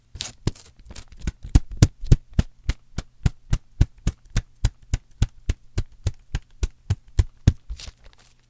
masking tape.11
pulling a masking tape strip taut